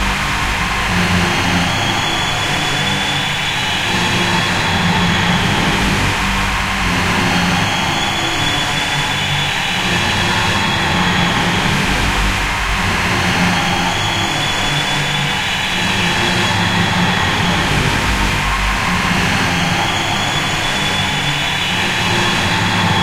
Day 42 11th August NoiseCollector Paulstretching Concert
Day 42.
Made really early on because it was such a good idea.
A remnant. A leftover.
Uses these NoiseCollector sounds:
Then paulstretched in Audacity...sounds nice but weird.